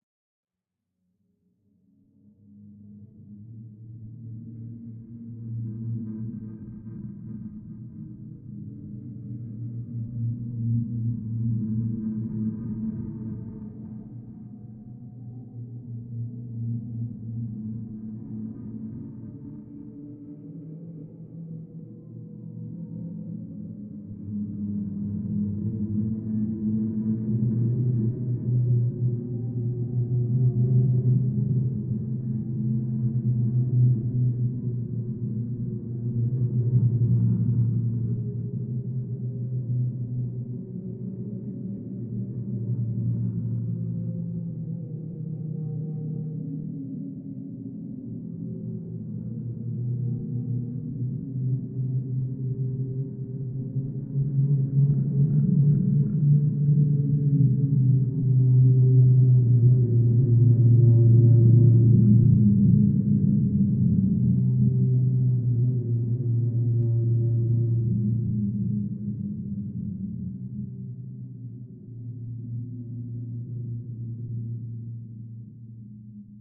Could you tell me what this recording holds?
Ghost wails
A sound made to envoke eerie creepy feelings.
Could be used in a creepy animation, or location in
a game. It was created from a clip of me humming.
This sound, like everything I upload here,
ghost, eerie, creepy, synthetic, sinister, atmosphere, fearful, free, voice, howl, spook, night, spooky, spectre, atmospheric, scary, voices